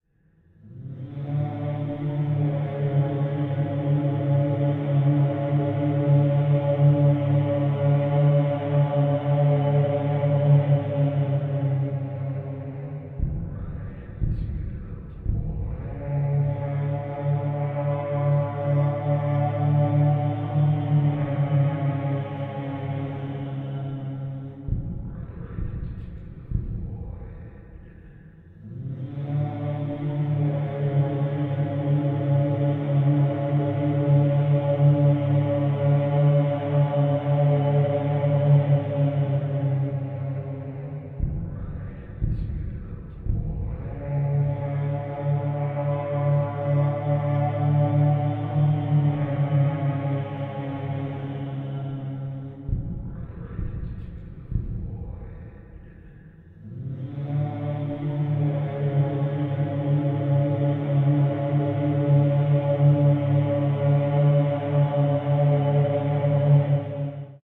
horn-like vocal drone with thumps
multiple layers of vocals run through piles of reverb, mumbles, thumps, looped
ambient, atmosphere, creepy, dark, dark-ambient, drone, drone-loop, electronic, horn, horror, loop, noise, reverb, sinister, spooky, vocal